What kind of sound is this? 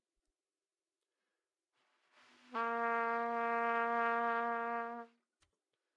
Part of the Good-sounds dataset of monophonic instrumental sounds.
instrument::trumpet
note::Asharp
octave::3
midi note::46
good-sounds-id::2853